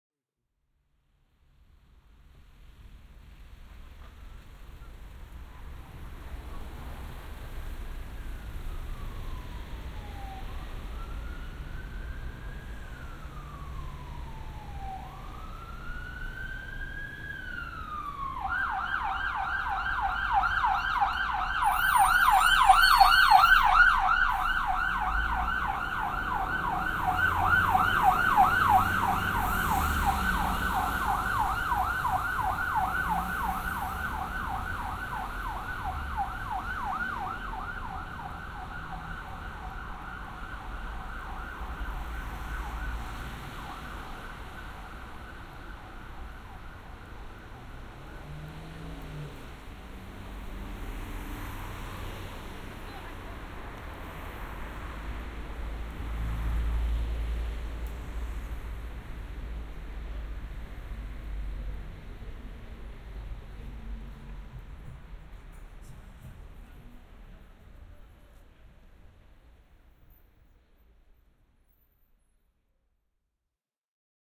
Sirens & Traffic on Upperton Road, Leicester 14.11.11
This is a binaural recording made using the Roland CS-10EM binaural mics. To get the full effect you should be listening to this recording through headphones.
I live on Upperton Road and sirens are one of main sonic features of the area as it is one of the main roads leading to Leicester Royal Infirmary.